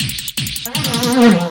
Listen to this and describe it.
special fx audio